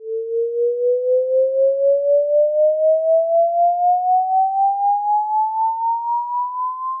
GUERARD Karl 2012 13 son3
//////// Made using Audacity (only):
Generate whistling ( begin : 440HZ, end : 1056
Amplitude : 0.8 to 0.1
time : 7s)
Apply Cross fade in
Apply tremolo on it (-26 degrees, wet 60, frequency 0.4)
//////// Typologie: Continu variÈ (V)
////// Morphologie:
- Masse: sifflement seul
- timbre harmonique: pauvre, puissant et assez agressif
- Grain: son assez lisse, mais aigu amplifié par le vibrato
- Allure: Vibrante et tenue
- Attaque: l'attaque est graduelle
sound, Whistling, Audacity